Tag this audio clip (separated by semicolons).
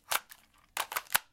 magazine; gun; gameboy-colour; weapon; clip; handgun; reload